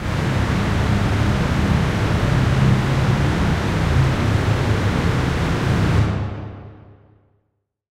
SteamPipe 2 Ambiance E1
This sample is part of the "SteamPipe Multisample 2 Ambiance" sample
pack. It is a multisample to import into your favourite samples. The
sound creates a stormy ambiance. So it is very usable for background
atmosphere. In the sample pack there are 16 samples evenly spread
across 5 octaves (C1 till C6). The note in the sample name (C, E or G#)
does not indicate the pitch of the sound but the key on my keyboard.
The sound was created with the SteamPipe V3 ensemble from the user
library of Reaktor. After that normalising and fades were applied within Cubase SX & Wavelab.
ambient, atmosphere, industrial, multisample, reaktor, storm